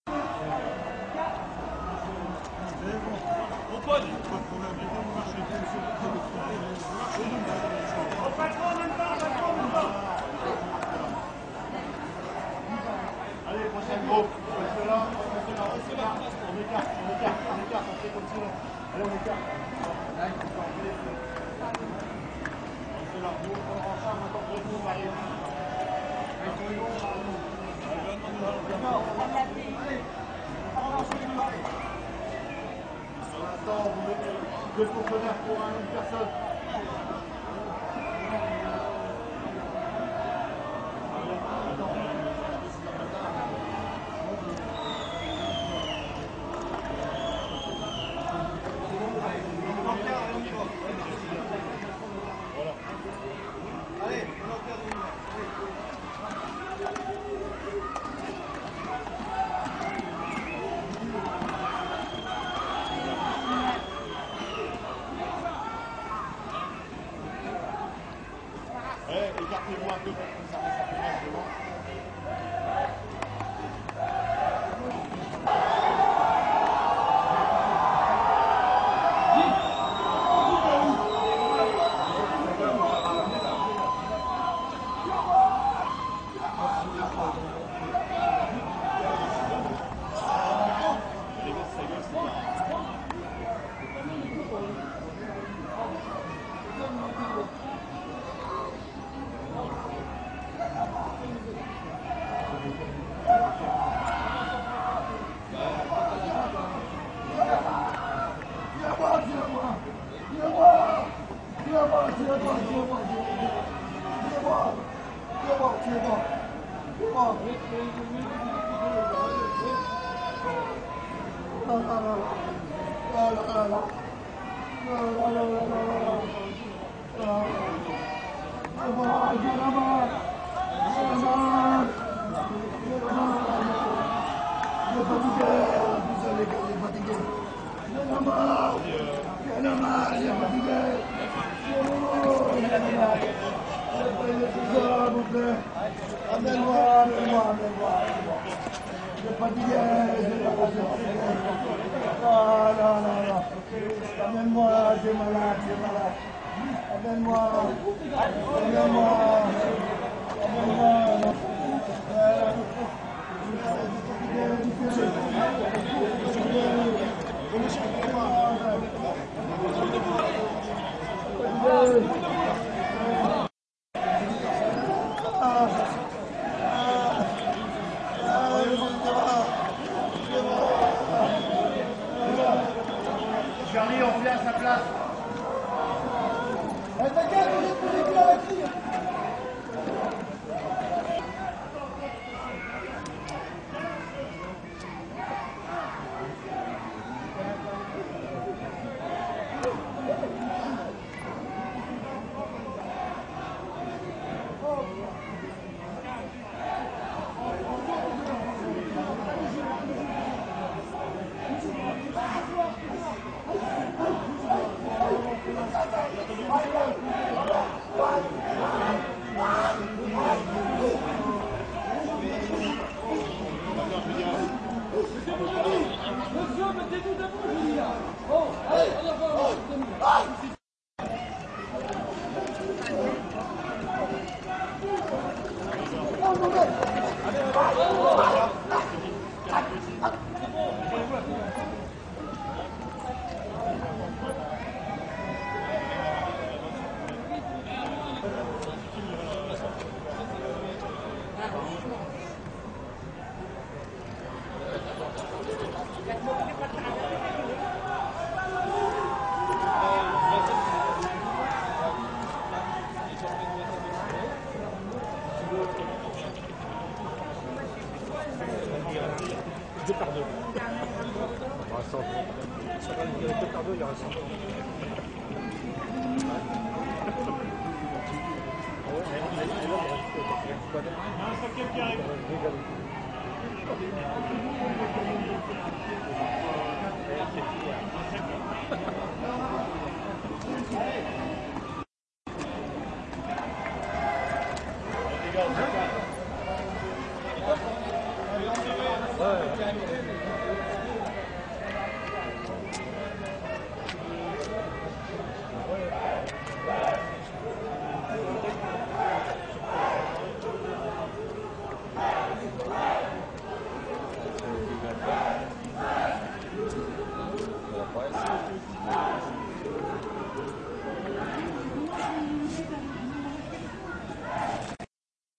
Salida de inmigrantes de un centro de internamiento para extranjeros en Francia
Out of immigrants from a detention center in France
fortress, inmigration, europe, Deportation